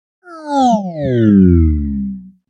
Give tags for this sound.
cartoon cosmos flight sfx space

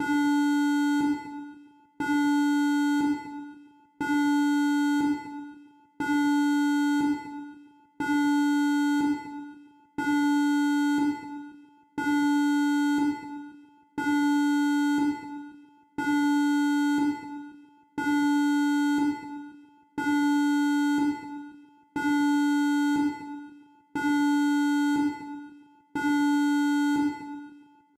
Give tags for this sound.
Alert Beep Emergency System Warning danger launcher panic rocket shooting siren spaceship submarine war warfare

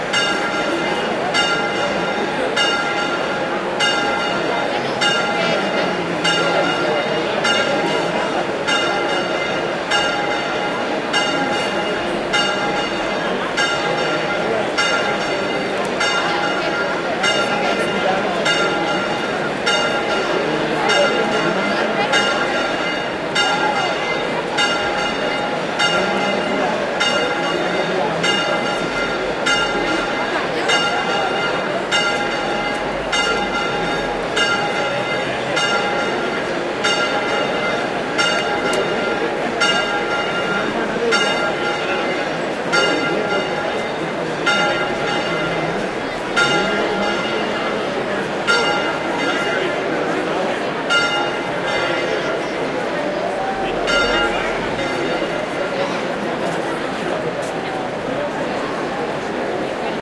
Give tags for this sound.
bells city crowd field-recording people seville voices

